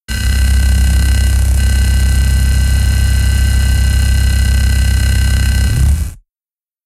Striker Close
digital, fx, harsh, sci-fi